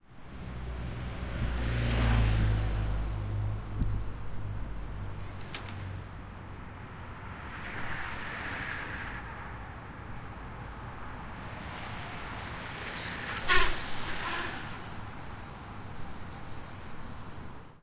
A men's bike race. First the pacing motorcycle goes by, then the lead racer, then the rest of the racers. At the end someone uses their squeaky brakes.
Recorded with a pair of AT4021 mics into a modified Marantz PMD661.